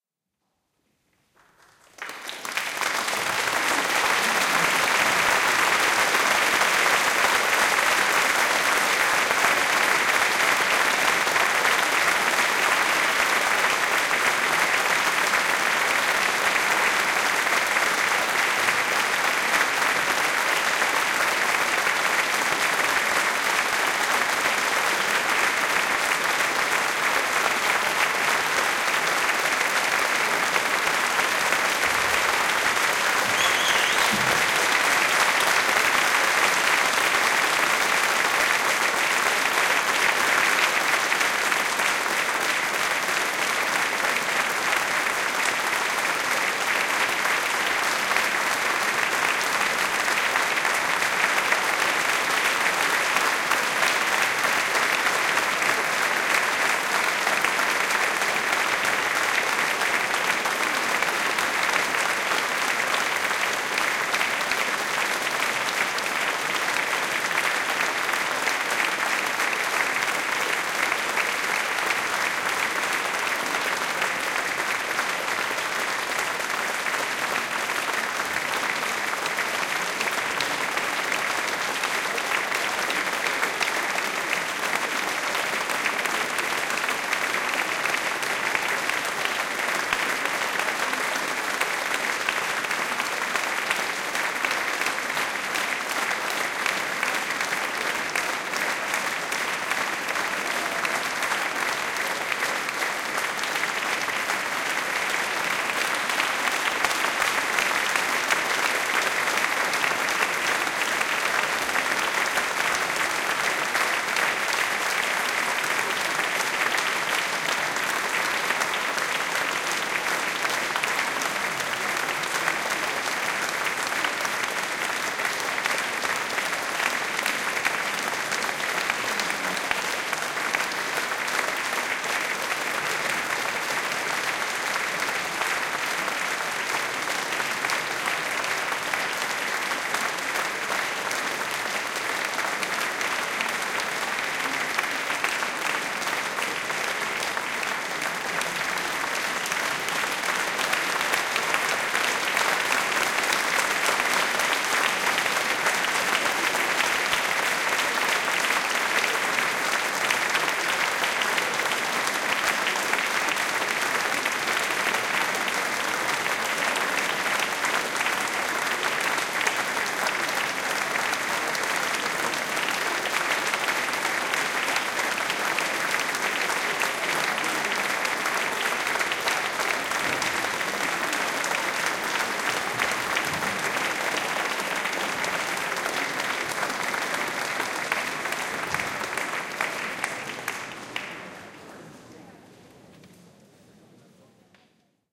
Applause very long
An applause I recorded in my town's church after a really great light show they had there. All this was recorded onto a Canon XM2 / GL2 camcorder.
final, applause, long, very-long, great, event